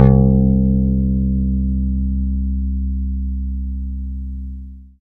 One in a collection of notes from my old Fender P-Bass. These are played with a pick, the strings are old, the bass is all funny and there is some buzzing and whatever else including the fact that I tried to re-wire it and while it works somehow the volume and tone knobs don't. Anyway this is a crappy Fender P-Bass of unknown origins through an equally crappy MP105 pre-amp directly into an Apogee Duet. Recorded and edited with Reason. The filename will tell you what note each one is.